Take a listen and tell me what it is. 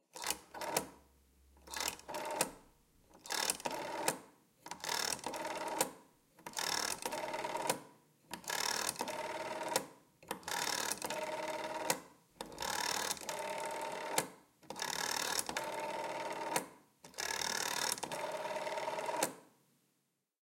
A wall-mounted rotary phone in my house being operated. The numbers 1-9 are dialed, followed by 0. Recorded with a Roland Edirol R-09HR and edited in Adobe Audition.